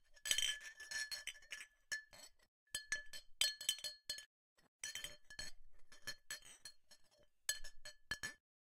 Glasses Chinking
Some small glasses are shuffled together and chink.
chinking, glass, shuffle